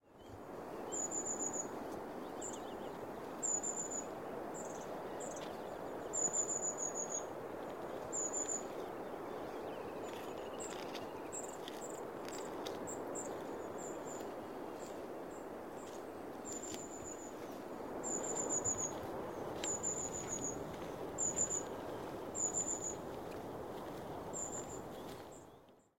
bird in oostduinen 17
Birds singing in oostduinen park in Scheveningen, The Netherlands. Recorded with a zoom H4n using a Sony ECM-678/9X Shotgun Microphone.
Evening- 08-03-2015
birds, field-recording, nature, netherlands